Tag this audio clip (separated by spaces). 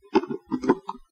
rattling shake rattle